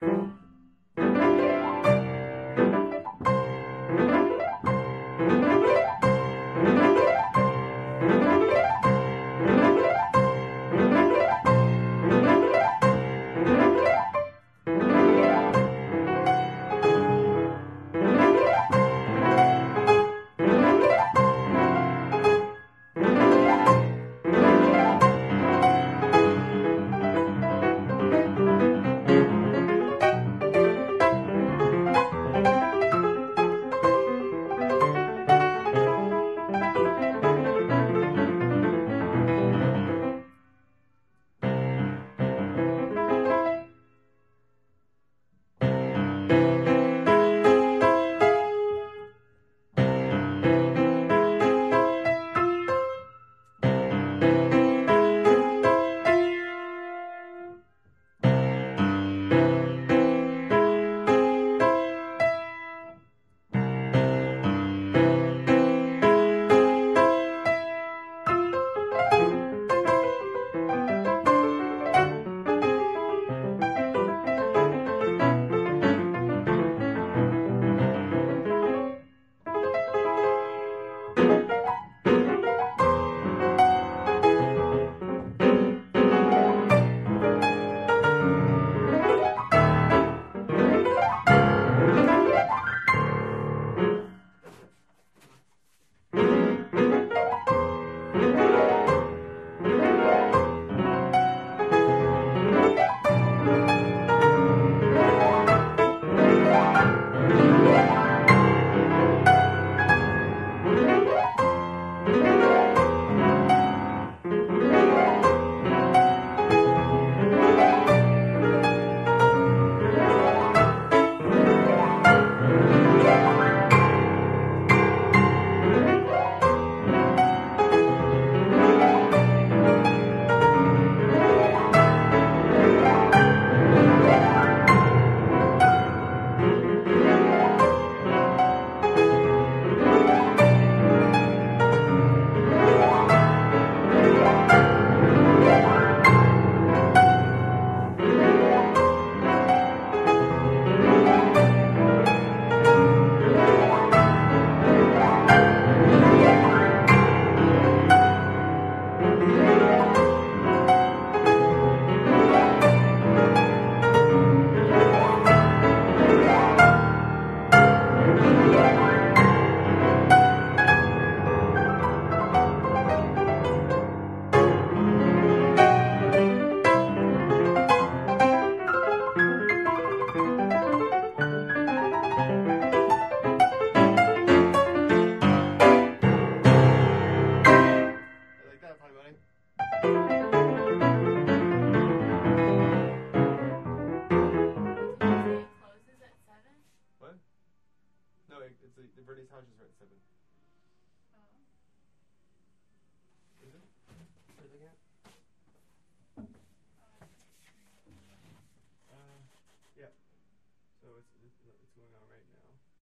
Practice Files from one day of Piano Practice (140502)
Logging, Piano, Practice